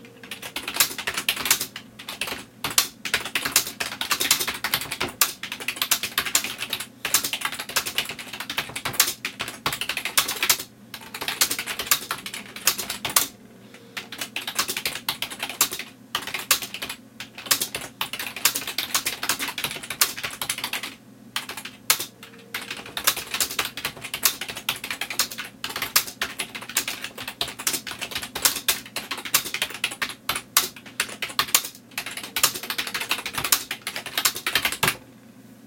typing hyperactive
Extremely fast typing on a PC keyboard